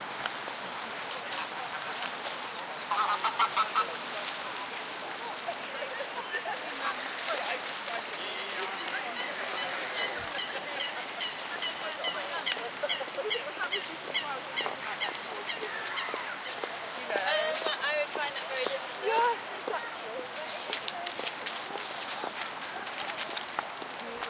Birds in the park 3
Birds in a park recorded on Zoom H4
Ambiance, Birds, City, Countryside, Ducks, Movie, outside, Park, Passing, People, Public